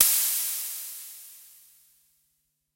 MAM ADX-1 is a german made analog drumbrain with 5 parts, more akin to a Simmons/Tama drum synth than a Roland Tr-606 and the likes.
mam, adx-1, drumbrain, metal